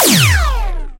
Laser Shot Big 3
Clasic Laser/Raygun shot. Big gun/laser.
action, big, classic, laser, retro, shooting, shot, spaceship, videogame